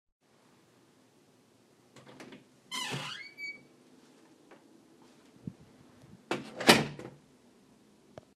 creaking door 3
Open and close a squeaky wooden door
wooden, creak, open, door, door-closing, close, squeaky, door-opening, creaky